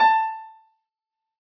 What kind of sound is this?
Piano ff 061